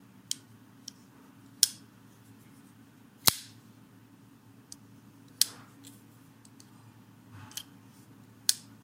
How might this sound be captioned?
Flipping knife
Knife being flipped opened and closed
knife, open